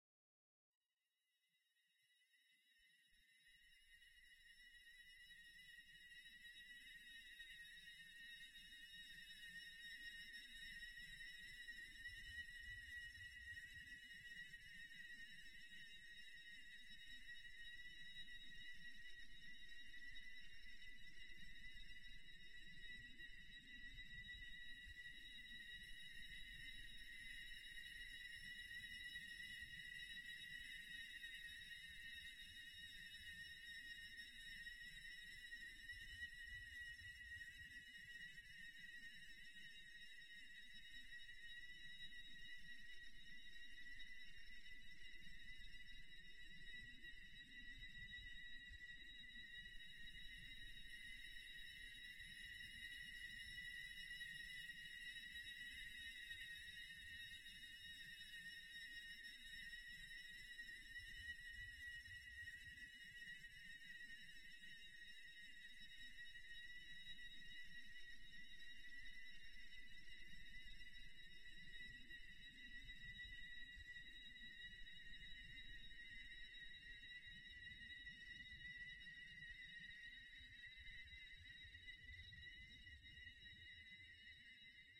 A high pitch sound that could be used to build suspense. Do not remember what the original recording is of, most likely a metallic ding in a soundbooth, which was significantly stretched through granular synthesis and further processed in Reaper.
evil, pitch, suspense